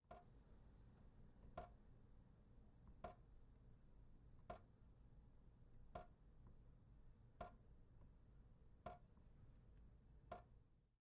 Sink Dripping; Near
Water dripping into a sink.
drain, water, trickle, sink, faucet, leak, drop, dribble